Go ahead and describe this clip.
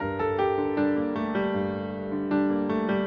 Lonely Walk
Descending m9 arpeggio on clean piano.